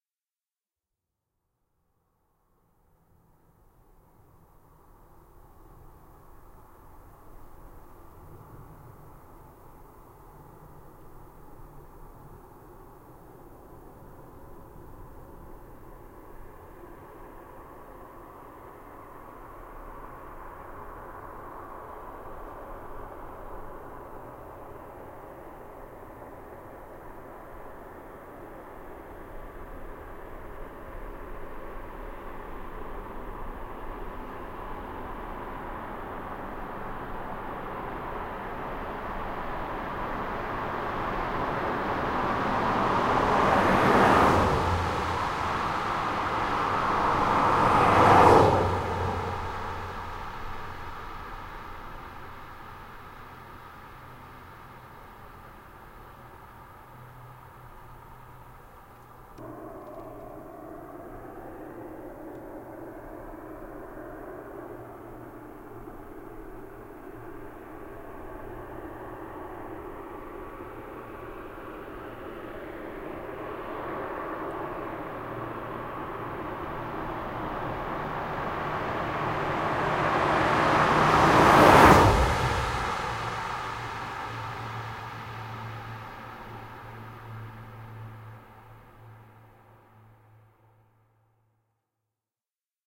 Cars and trucks passing on a highway - stereo recording
Recorded in 2002
Tascam DAT DA-P1 recorder + Senheiser MKH40 microphones
Cars, trucks, highway